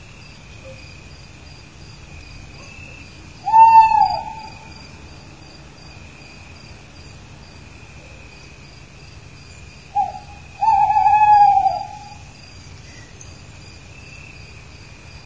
An owl hooting at night